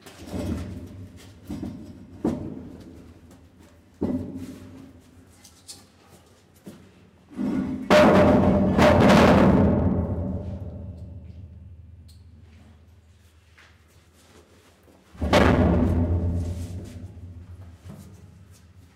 Tampon-Fermeture
Closing the door to the unofficial catacombs of Paris from downstair recorded on DAT (Tascam DAP-1) with a Sennheiser ME66 by G de Courtivron.
door, catacombs, career, paris, underground, closing